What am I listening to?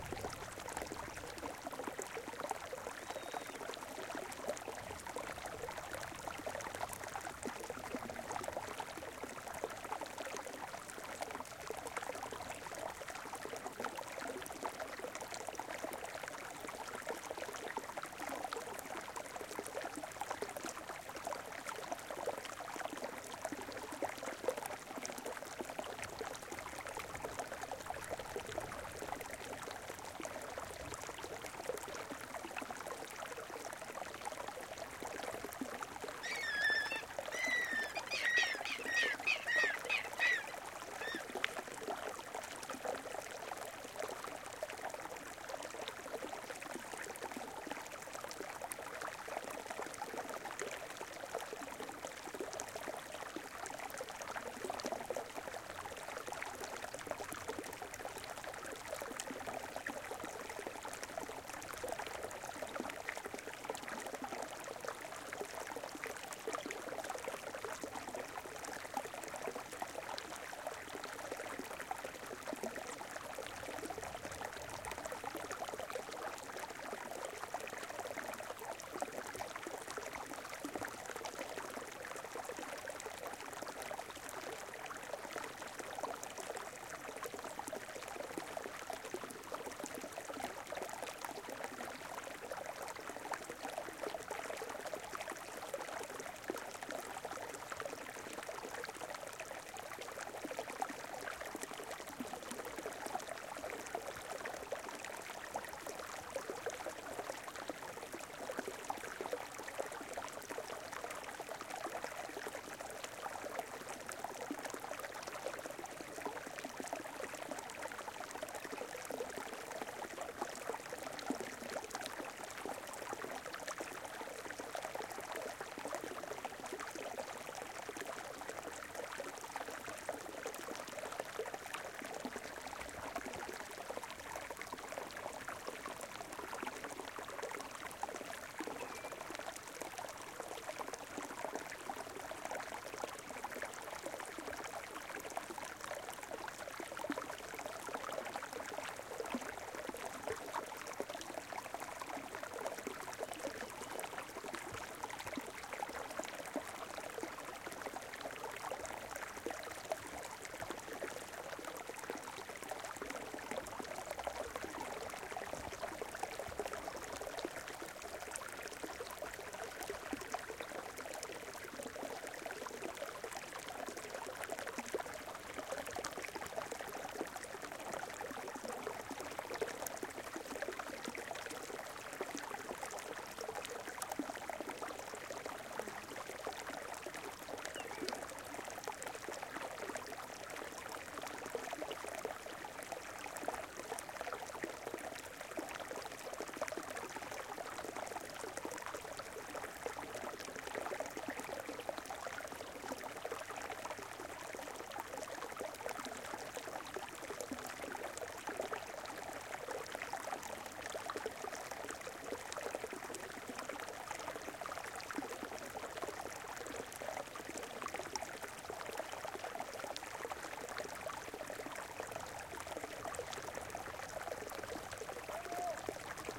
AMB en humedal por la tarde
Afternoon ambience of a marsh in the chilean highlands near the bolivian border. Water flowing and some birds in the near background.
Rec: Tascam 70D
Mics: Two Rode M5 in ORTF configuration.